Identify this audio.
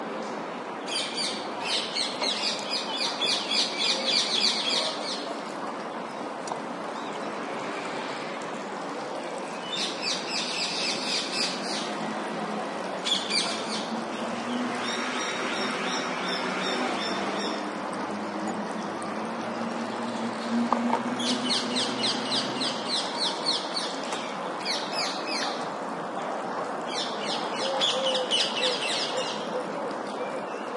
Strange mix of Green Parrots screeching and traffic rumble in background. These birds are becoming quite common in the public gardens of Seville, the flock was recorded near a giant Ficus elastica they use to roost, inside Jardines de Murillo, Seville
city, streetnoise, parrot, field-recording, birds